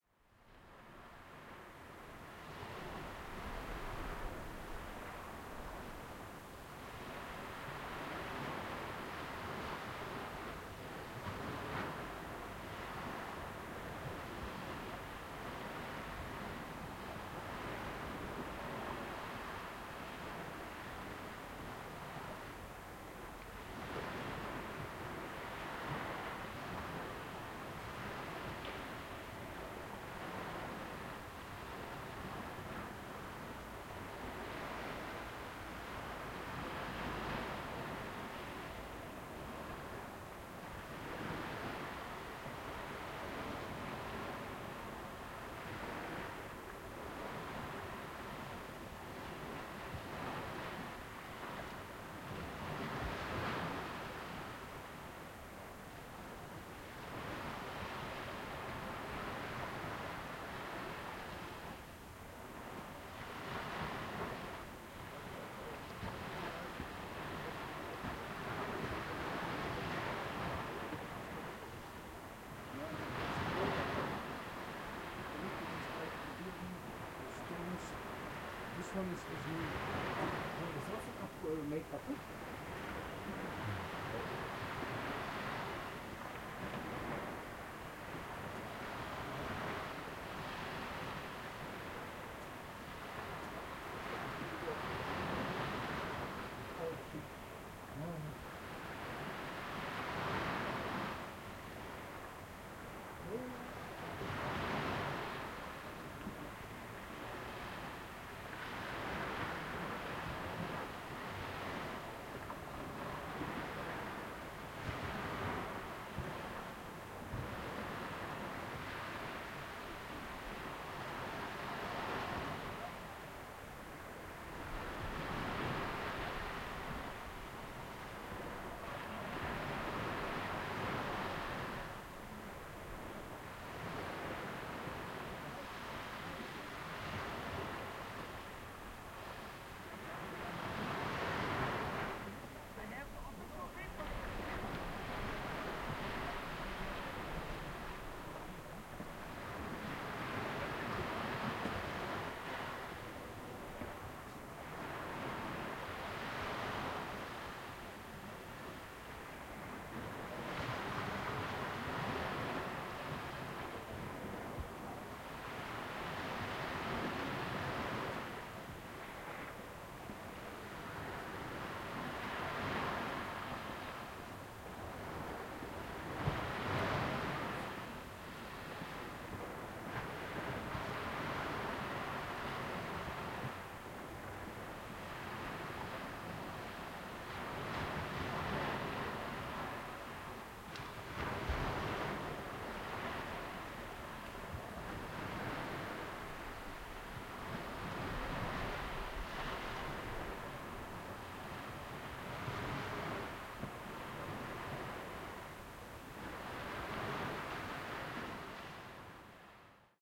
Recorded 200 meters from the sea outside a window of a building. We hear the waves, some men talk under the window.
Recorded at 02:00 in the morning in Ostend, Belgium.